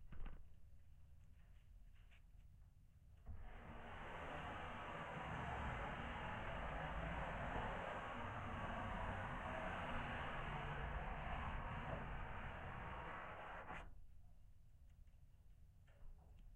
H4 zoom recorder with DIY piezo mics, recording multiple hands scrape a wooden plinth.
field-recording, piezo-mic, wood